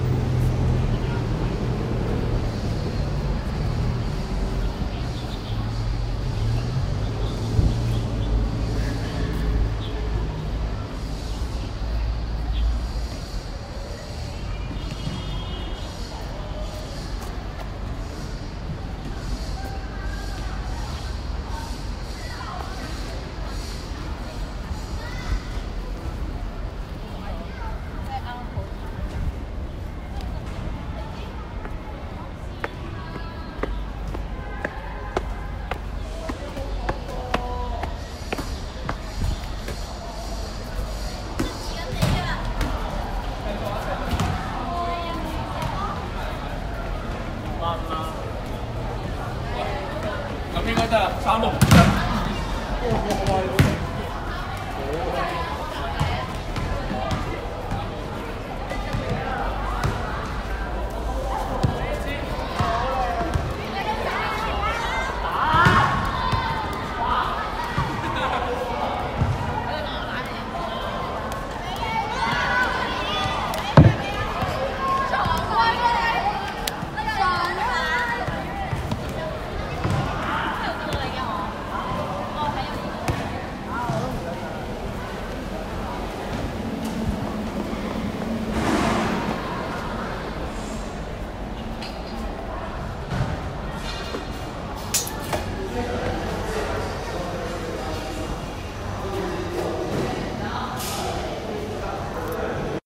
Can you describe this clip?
soundscape in USJ
At first, the cars pass through in front of the school. And then, the people were talking around and pass by us when we went through the school-yard. We walked up the stairs and pass through the playground, we heard children playing basketball. We heard the balls hit the ground and the children screaming and shouting. Beside that, there was a sound of repairing near the terrace. At the end, we arrived canteen and we heard the students talking.
playground, kids, children, shouting, basketball, school, canteen, playing, the-birds